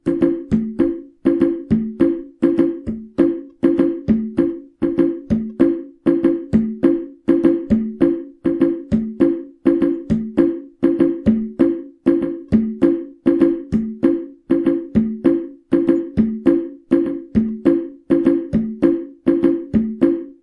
Playing bongo like drums